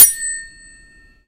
tablespoon and teaspoon
Very close recording of metal spoons. Audio was trimmed and amplified to create a sample.
kitchen-utensil, found-instrument, noise, MTC500-M002-s14